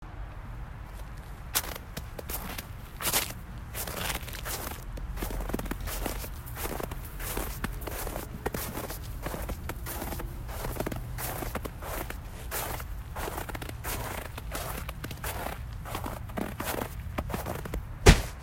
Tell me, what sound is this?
Walking on Snow
nature, field-recording, winter